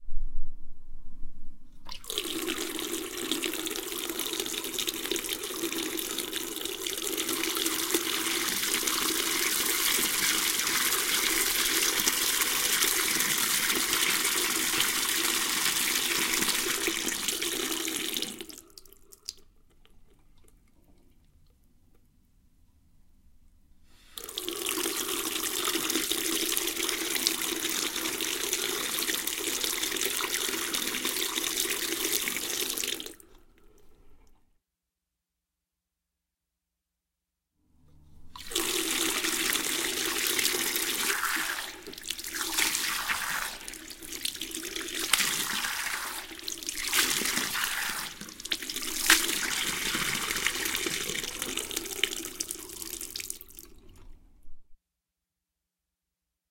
Running water in a small sink at various speeds and intensities. Then filling up my hands with water and allowing it to splash down as if I were washing my face.
hands, wet, wash, porcelain, water, splash, face, running, sink, washing